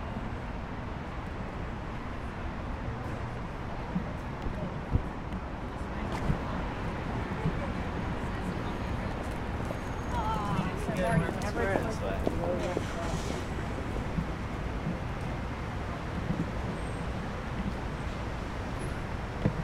City Ambience Sidewalk Group passes by
A group of people walks by while talking amongst themselves.
city, sidewalk, people